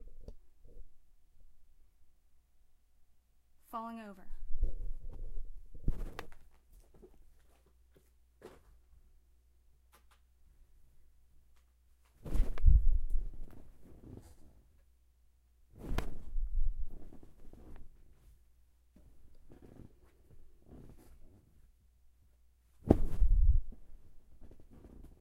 Person falling down onto a couch